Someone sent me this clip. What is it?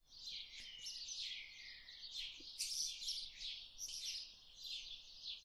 The third field-recording of sparrows with the Zoom H5. Post-processed with Audacity.
chirping, sparrows, bird, birds, forest, spring, tweet, birdsong, sparrow, chirp, nature, field-recording